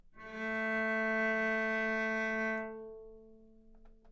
Cello - A3 - other
Part of the Good-sounds dataset of monophonic instrumental sounds.
instrument::cello
note::A
octave::3
midi note::45
good-sounds-id::453
dynamic_level::p
Recorded for experimental purposes
good-sounds, single-note, neumann-U87, multisample